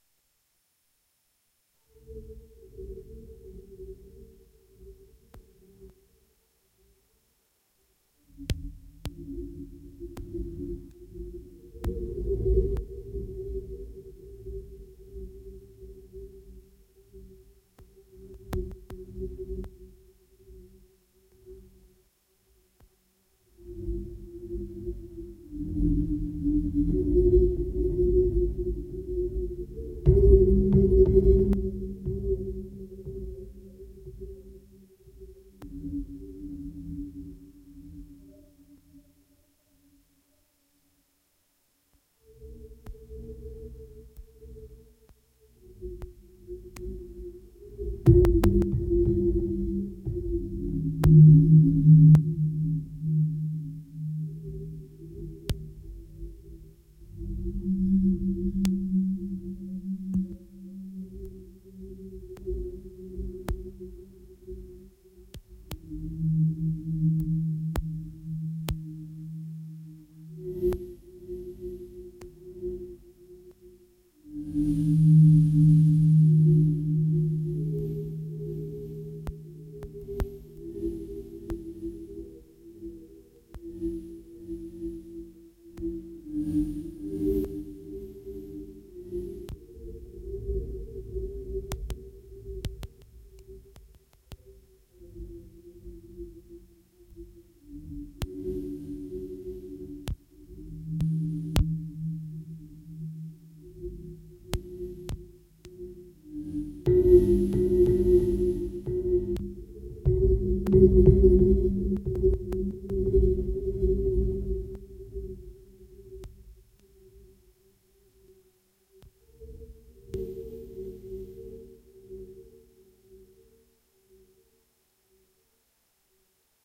Common Disorder
Sine waves generated with a 3x OSC.
Re-recorded on a higher volume.
Fx: echo and ASIO driver bugs.
disorder, dark, black, sub, cavernous, odds, field, ambient, common, cavern, recording, bass, osc, fx